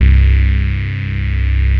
SYNTH BASS 0204
SYNTH SAW BASS